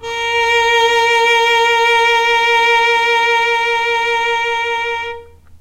violin arco vibrato